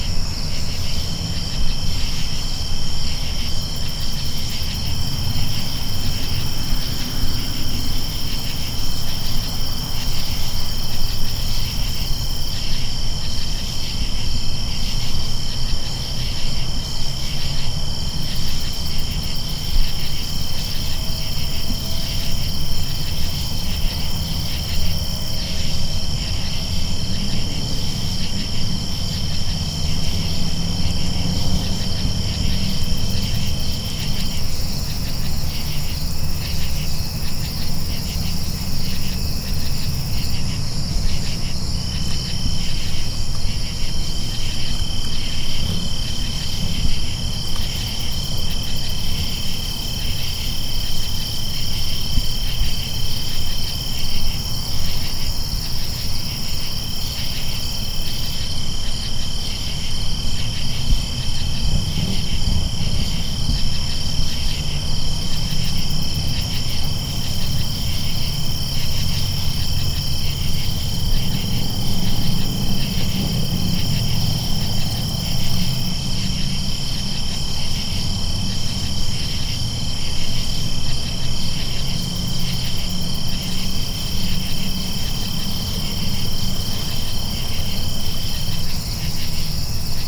So last night my dad was like "OMG TONS OF CRICKETS" and I was like "There can't be that many crickets" so I went outside and was like "OMG TONS OF CRICKETS" so I grabbed my Samson C01U condenser mic and my laptop, headed out and recorded this.
Now sadly I live in a part of city near 5 major roads/freeways, so there was bound to be quite a lot of background car noises. It's relatively minor.
Mostly crickets with some insects I don't recognize in here.
Enjoy.